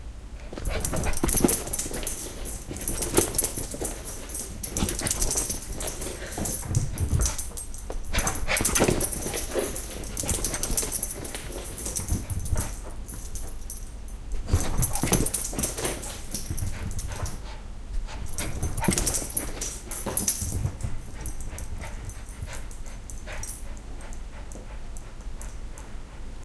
Binaural recording of our dog running laps around a piece of furniture in the house. He pauses occasionally on the couch to my left.Recorded on a Sony minidisc MZ-N707. Microphone is homemade consisting of Panasonic capsules mounted into headphones.